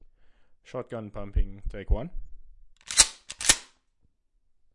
180081 Shotgun Pump 01 SLOW
Pumping a Shotgun slowly